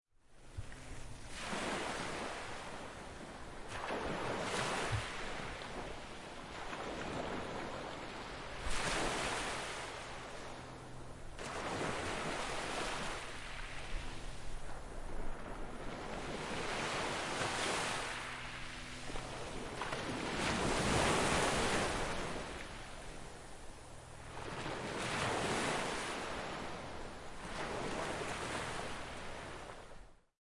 Southsea shore. Recorded with Zoom H4n
lapping, ocean, water